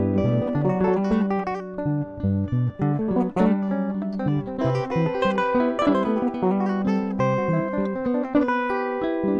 layered guitar loop